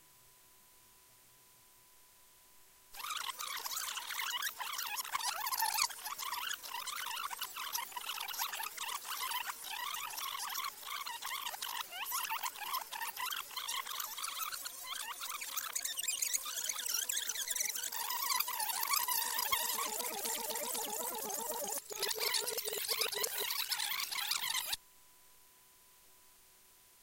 Rewind cassette tape
Rewind radio show on cassette-tape.
Record with a Technics M04 stereo cassette deck, rewind a cassette tape TDK A-60. Sound device Realtek on-board (motherboard M5A 78L-M)
tape-recording, live-recording, rewind, cassette-tape